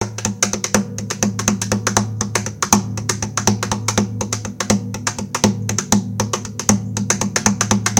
DESDRONS Percusssion
A collection of samples/loops intended for personal and commercial music production. All compositions where written and performed by Chris S. Bacon on Home Sick Recordings. Take things, shake things, make things.
acapella, acoustic-guitar, bass, beat, drums, free, guitar, harmony, indie, Indie-folk, looping, loops, original-music, percussion, piano, samples, sounds, synth, vocal-loops, voice